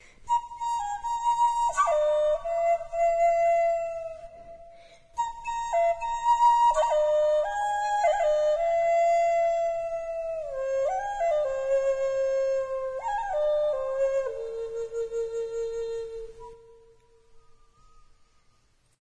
This is a 15 or so second sample of a branch flute made from yellow cedar in the key of A sharp. It has a clear crip sound which comes from a hard wood such as this and it reminds me of a very happy bird singing. This track is enhanced with a bit of a reverb.